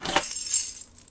Metal keys pickup sound 2
The sound of lifting from the table a bundle of 6 metal keys
keys, metal, pickup